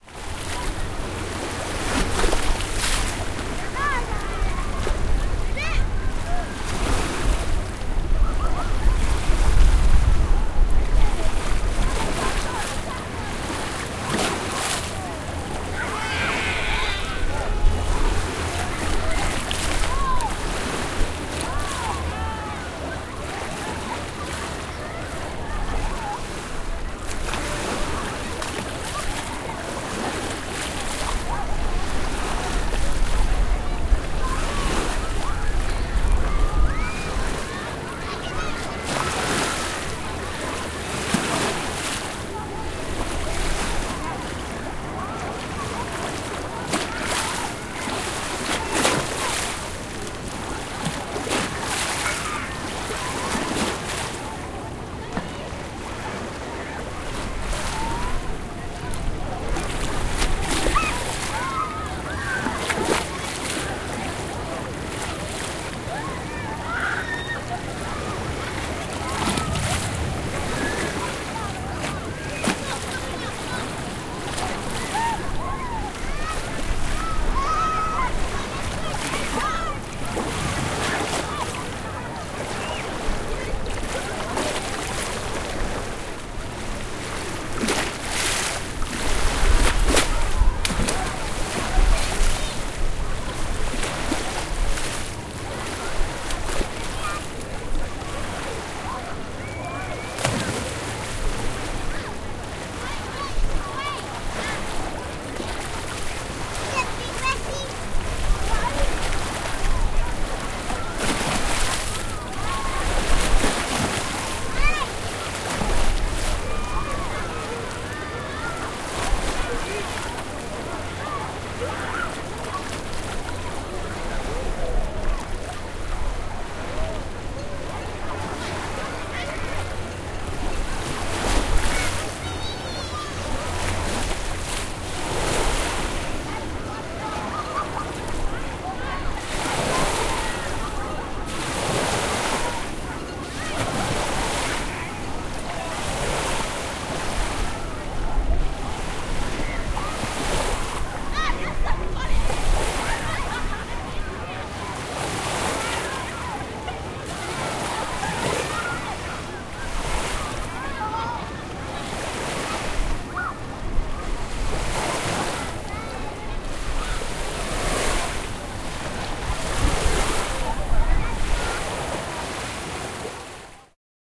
Children playing both in and near a bay of water.
Recorded at Gunnamatta Bay Park, Cronulla, Sydney Australia. Record Date: 23-JANUARY-2010